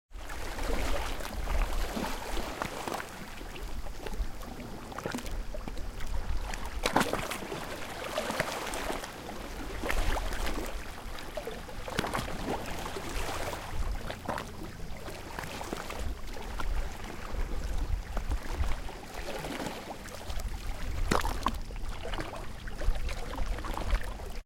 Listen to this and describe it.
Middle waves at a small pier
Middle sized sea waves splashing at a small pier.